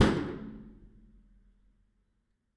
EQ'ed and processed C1000 recording of a metal trolly hits. I made various recordings around our workshop with the idea of creating my own industrial drum kit for a production of Frankenstein.
drum, high, hit, metal, metallic, percussion, percussive, pitch, ting